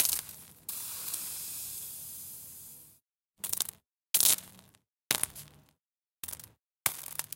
Water dripping on hot plate making steam
Dropping a series of cold water drops on a hot plate. Steamy sounds, some short, some subtle, some with a nice tail to it.
water, steam, steamy, drops, hot, plate